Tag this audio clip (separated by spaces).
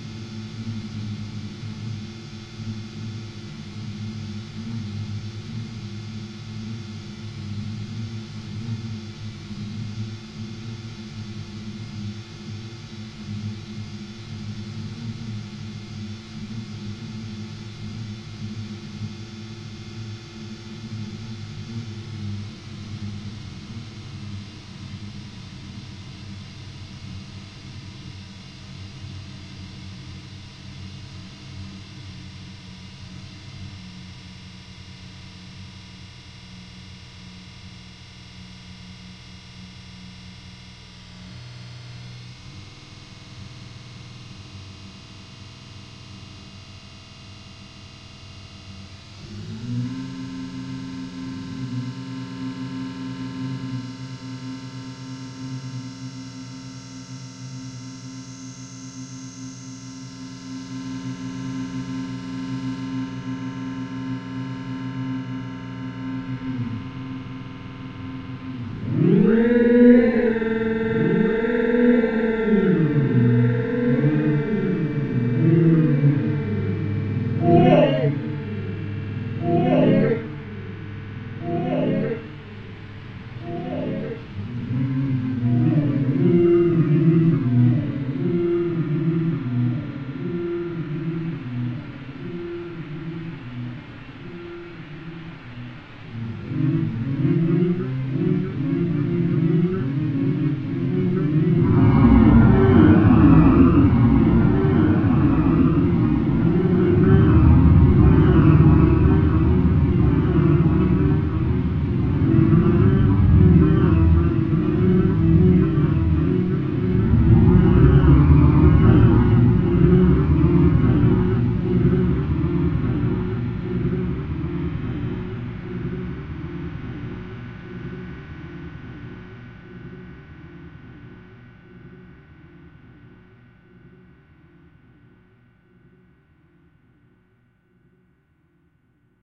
ghost granular